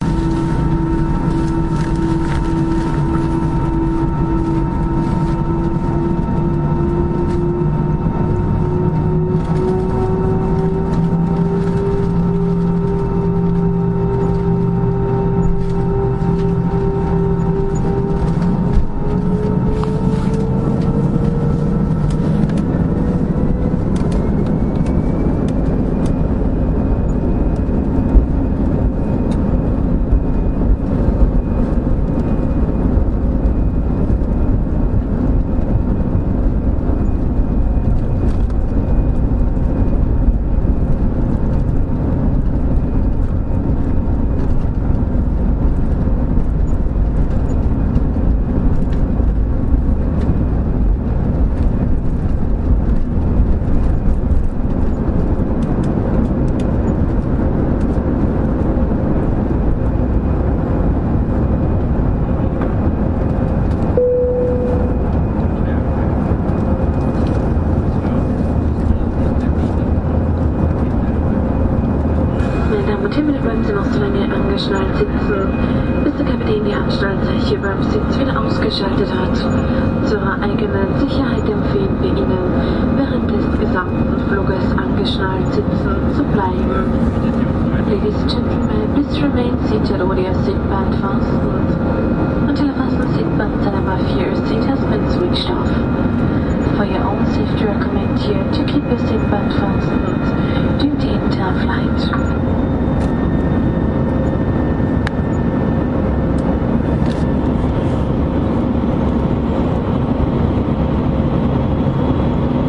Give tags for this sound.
airplane; launch; runway; takeoff

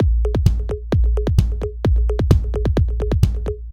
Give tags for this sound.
club; house; loop

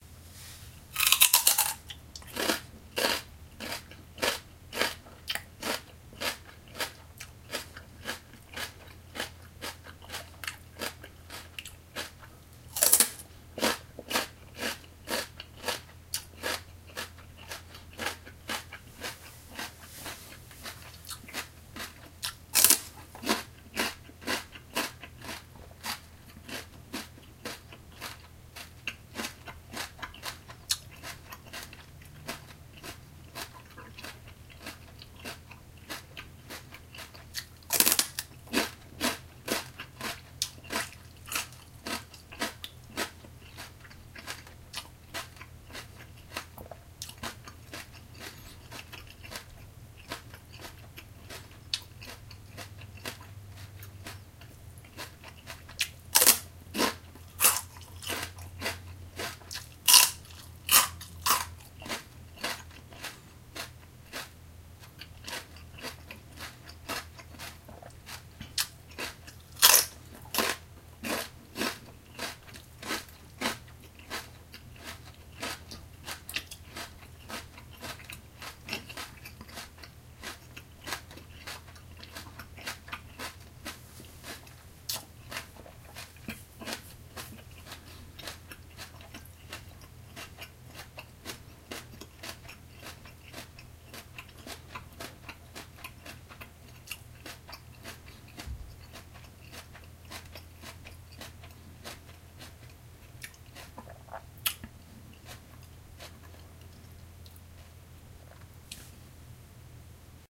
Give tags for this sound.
chew; eating; eat; sitophilia; smack; munch; crunch; bite; lip

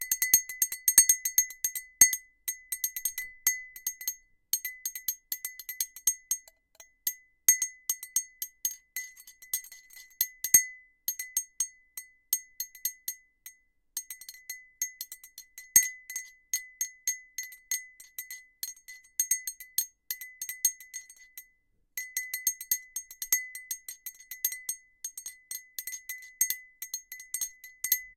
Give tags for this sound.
tinkle; jingle; glass; stir; empty-glass; cink; n; stirring; clink; thin-glass

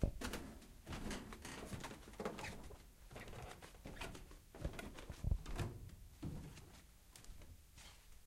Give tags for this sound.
crackle; wood

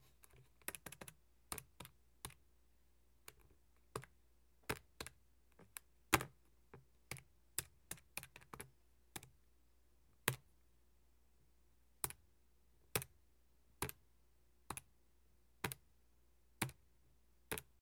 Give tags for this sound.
zoom; electronic; field-recording

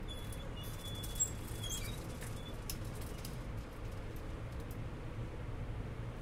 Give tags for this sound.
field-recording; door; library; squeak; closing; elevator

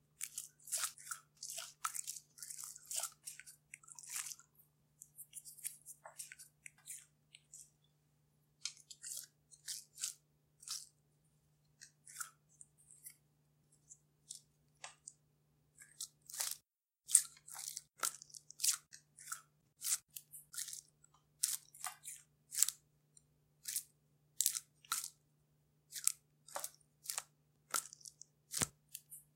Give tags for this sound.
chewing; munch; monster; mouth; crunch; wet; chew; water; alien; eating; creature; eat

